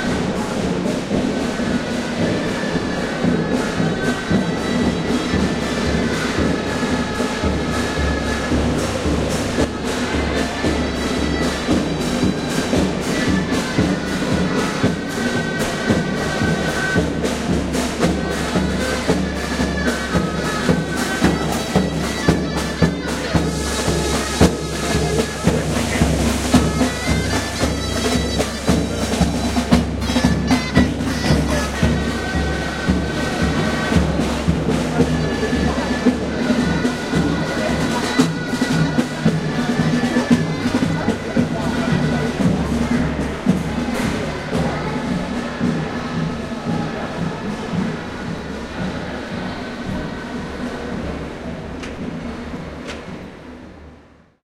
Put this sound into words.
Station Leon2
Recording in train station of Leon, Spain, with a crowd of people enjoying a folklore band. The microphone is moving, traveling past the crowd, lots of noise and voices